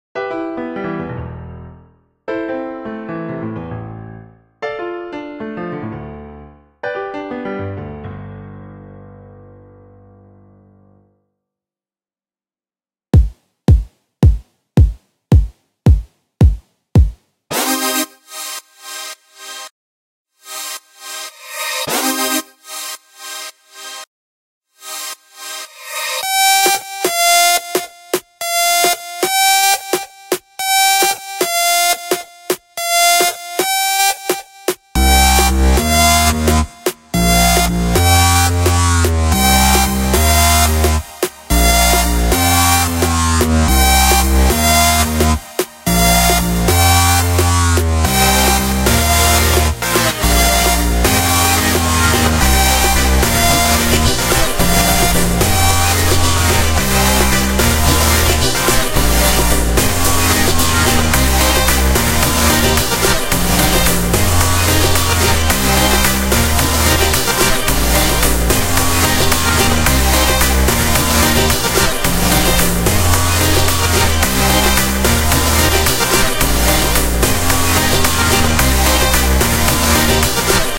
this song is a song I made in garageband and it doesn't sound like a theme it sounds like a song